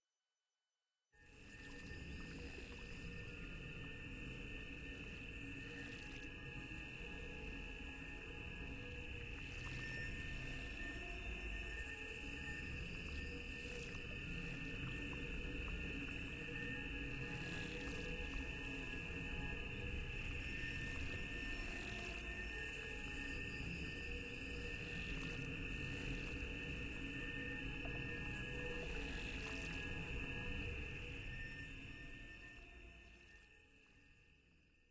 Bio Life Signs 1
alien, atmospheric, jungle, organic, squelchy, synthetic, world